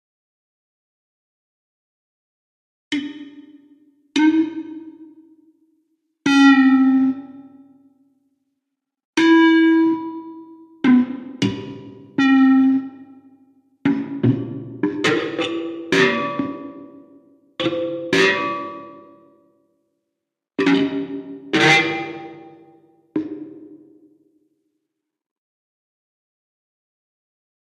Sound was picked up by a contact mic clamped to the plastic box and put through a small amplifier.Mostly plucked sounds, but also some oddities in there where I have used pens or similar objects to scrape, and hit the elastic.
wierd, elastic, plastic, acoustic, contact-mic, contact-microphone, resonance, strange, plucked, rubber-band, experimental